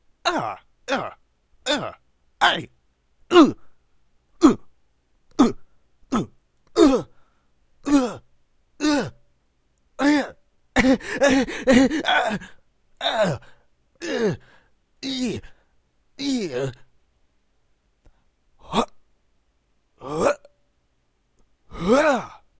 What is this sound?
Grunts - Male
Male, Gay, Grunts